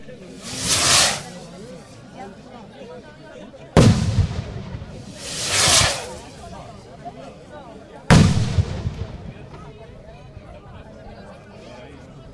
People talking, eating and drinking, occasional fireworks
firework, talking, bang, crack, people, rocket, party, explosion